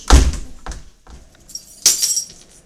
This sound is composed of two parts. The first part is a door sound that closes, and the second part is a sound of keys falling on the floor.
Both of these sounds were recorded at the university, and then I combined them on Audacity. I made the sound of the door the effects: Fade Out; Echo, Amplify. Then I added the new sound, assembling them together. In the second I added as effects: Amplify. To finish, I cut some pieces of the sequences to arrive at this final.
D'après les études de Schaeffer, la morphologie de ce son est, la première partie est une impulsion tonique (N'), puis on retrouve une impulsion complexe (X').
La masse est: un groupe nodal (avec plusieurs sons complexes).
Timbre: son éclatant.
Grain: rugueux.
Dynamique: l'attaque du début est violente.
Profil Mélodique: variation serpentine.